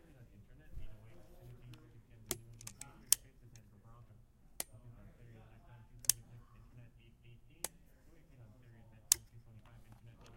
awesome light switch, not ideal SNR
one in a series of field recordings from a hardware store (ACE in palo alto). taken with a tascam DR-05.